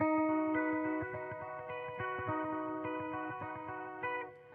electric guitar certainly not the best sample, by can save your life.
arpeggio, guitar, electric, spread